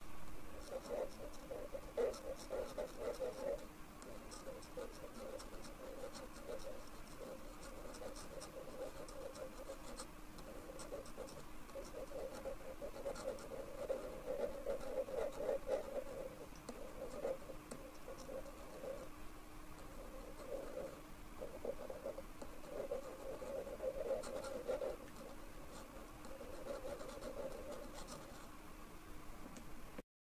This is the sound of filing nails.